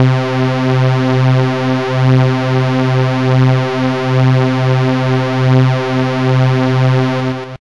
Detuned sawtooth waves